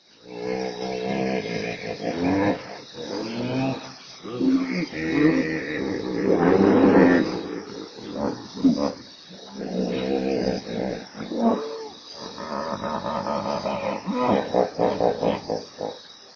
a group of hippos is grunting in Kafue River in Zambia. recorded in the morning on safari